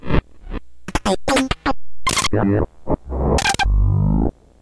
An old drum machine played through a Nord Modular and some pitch shifting effects and echos.
modular, drum, idm, digital, sound-design, 808, beat